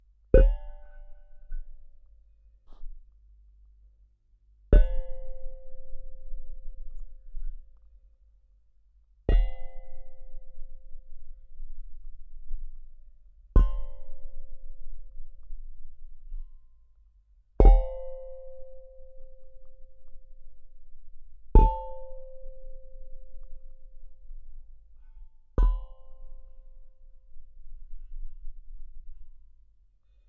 2023-01-07-childrens-toy-2x-contact-009
toy instrument recorded with contact microphones
asmr, chord, drum, hit, music, percussion, soothing, steel